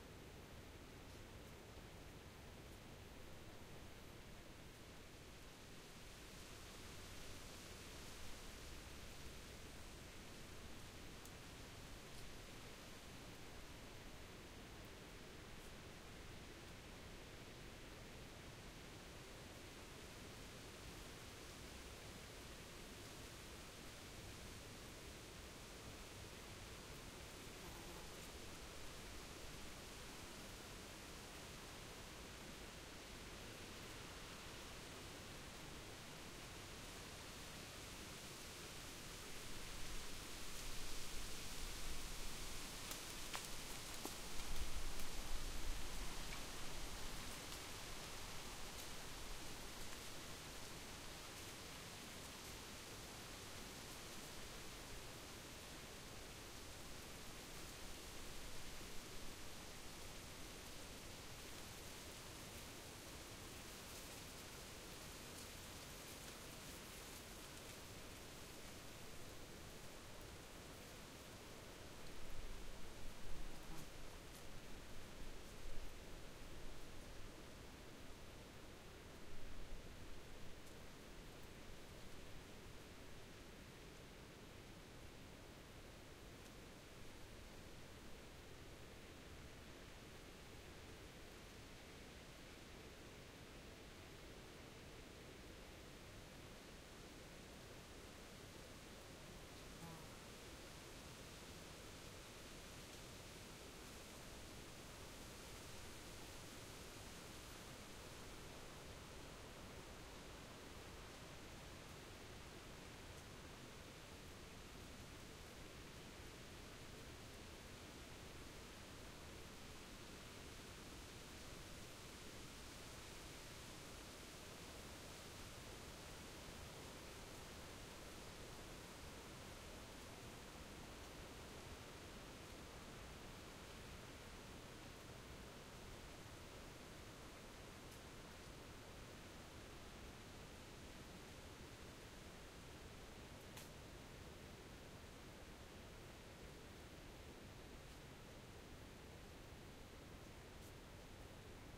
Wind in the Trees
Recorded on a beautiful spring day on a trail with high oaks overhead. You can hear the wind blowing through the treetops, the low grass blowing, and random insects on the ground.
field-recording, trees, loop